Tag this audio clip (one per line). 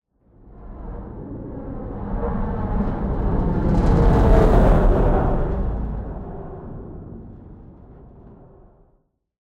flyby
train
pass-by
woosh
vehicle
passby
whoosh
fly-by
sci-fi
passing
scifi
pass